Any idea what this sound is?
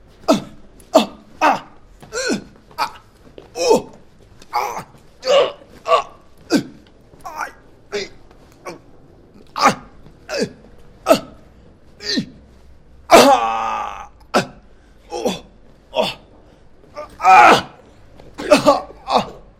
man being hit ouch painful yelling beatdown 2
pain, beatdown